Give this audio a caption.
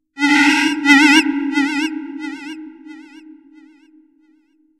dub siren 5 1
A ghostly effect, sounds very familiar but i cant recall exactly where ive heard a sound like this before... ?